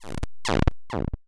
Randomly generated 8-Bit sound.
8-bit, arcade, chip, chiptune, lo-fi, retro, video-game